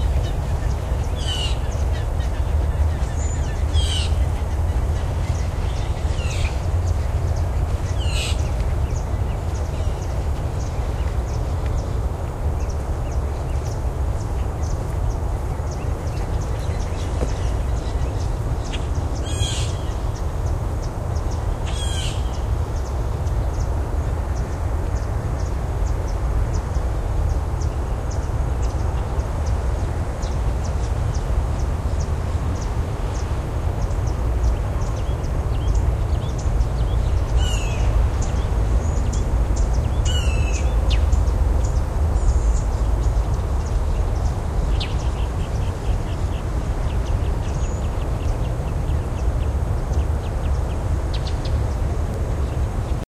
the birds are awake loopable
The rise of bird domination while recording during early morning walk through a nature trail with the Olympus DS-40/Sony Mic.
animal, bird, birdsong, field-recording, song, walking